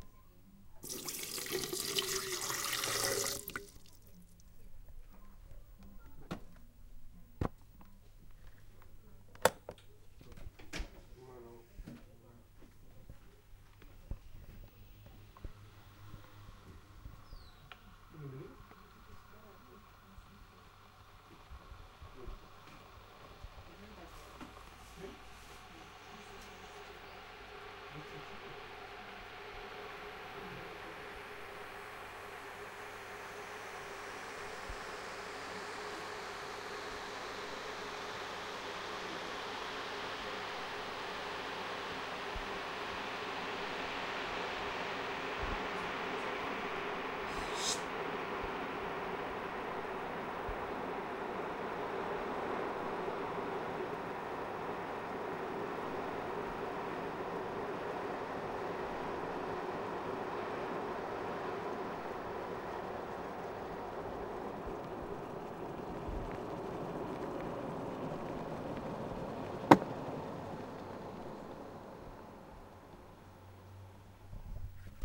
This is part of a series of workshops done in collaboration with Casa Asia, that attempt to explore how immigrant communities in Barcelona would represent themselves through sound. Participants are provided with recorders that they can take with themselves and use daily, during a period of time.
In the workshop we reflect collectively on the relation between the recorded sounds, and their cultural significance for the participants. Attempting to not depart from any preconceived idea of the participant's cultural identity.
Sound recorded by Mary Esther Cordero.
"Es el sonido del agua desde que lo pongo en el calentador de agua hasta que acaba hirviendo. La grabación se hizo en mi casa. Escogí este sonido como una manera de identificarme porque cada día el pobre calentador está en marcha, porque solemos, mi familia y yo, sobretodo mis padres y yo, preparar siempre infusiones o tés. Incluso lo utilizamos para poder calentar el agua para nuestros fideos instantáneos."